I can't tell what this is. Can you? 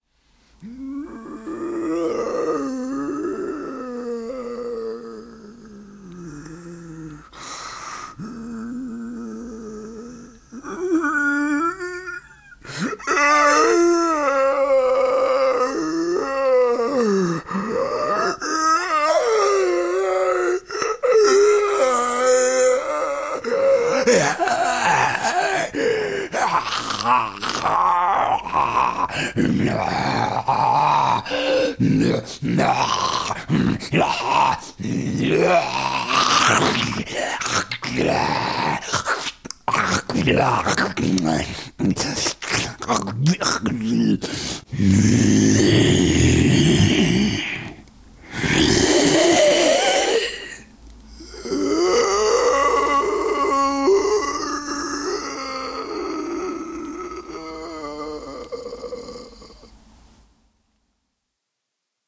Sound effects of classic Zombie, homage to George A. Romero.